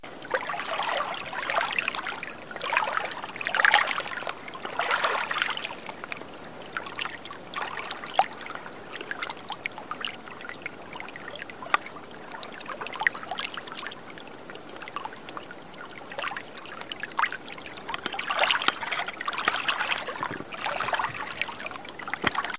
Water lapping on lake Pukaki,South Island,New Zealand
Water gently lapping on lake Pukaki,South IslandNew Zealand